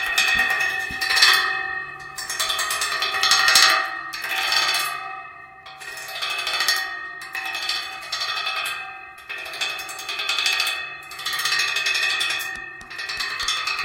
sonicsnaps LBFR Bhaar,Estella
Here are the recordings after a hunting sounds made in all the school. It's a metal barrier
Binquenais, La, Rennes